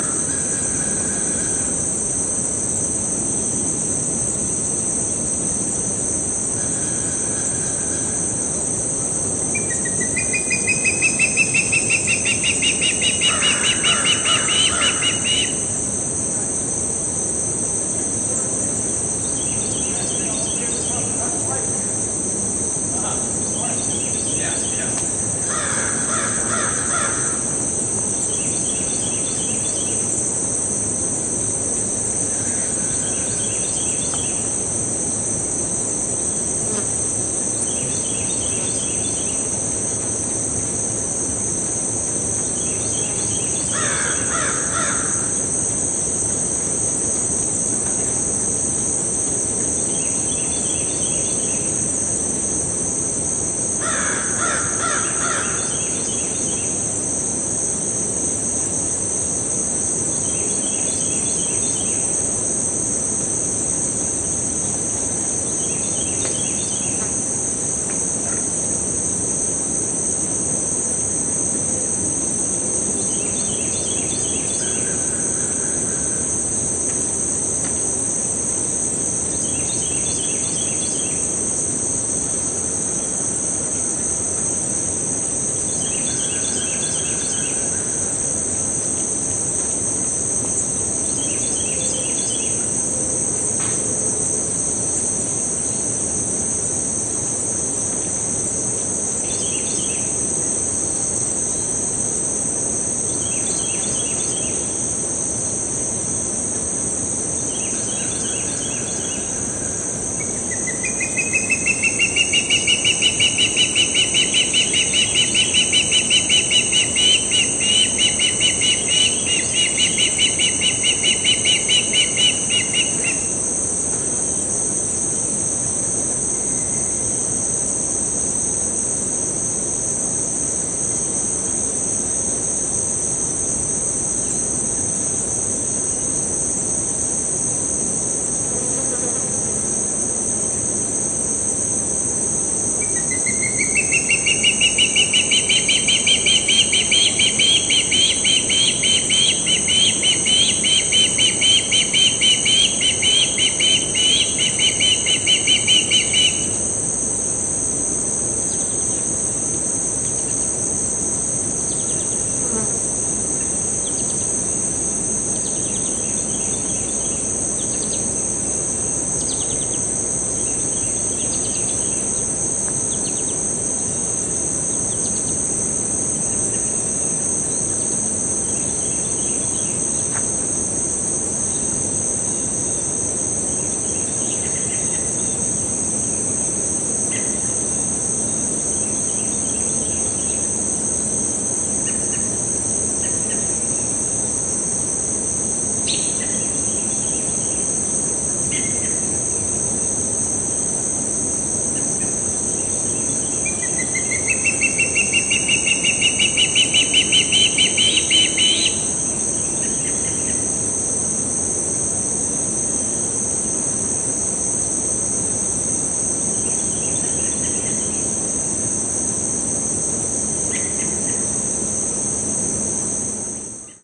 070821 flsp trail01
On a mosquito-ridden section of a hiking/biking/jogging trail, you can hear insects and birds wake up in the morning. Also, you can hear joggers pass by, sticks crack, and mosquitoes dive-bomb the microphone. This recording was made at First Landing State Park in Virginia Beach, VA, USA on the morning of 21 August 2007 with a Zoom H4. Light post-production work done in Peak.
birds, field-recording, first-landing-state-park, insects, joggers, swamp